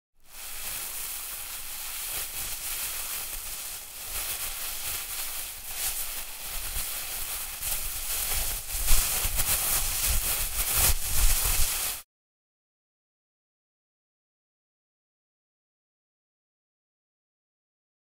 Plastic Bag 2
A plastic Bag being messed with. A more random variation.